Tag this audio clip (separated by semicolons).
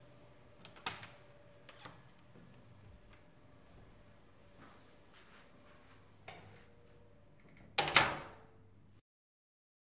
Close; Door; Open